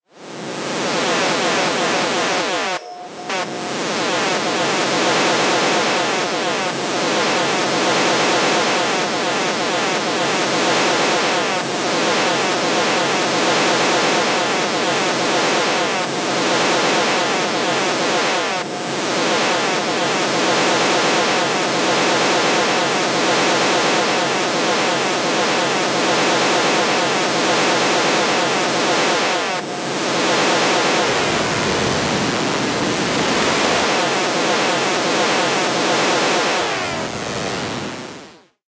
pulsar synthesis 01
Sample generated with pulsar synthesis. FM like sweeps with lots of panning and a slow rhythmic quality.
drone, noise, pulsar-synthesis